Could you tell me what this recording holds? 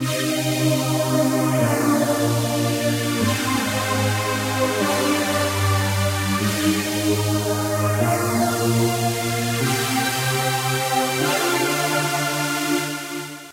Riser 3 Flicker
Synth strings made for progression techno/trance. 150 bpm
synth, sequence, phase, progression, techno, melody, trance